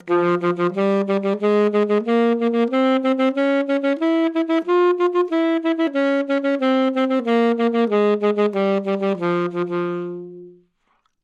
Sax Alto - F minor
Part of the Good-sounds dataset of monophonic instrumental sounds.
instrument::sax_alto
note::F
good-sounds-id::6640
mode::natural minor
neumann-U87, Fminor, scale, good-sounds, sax, alto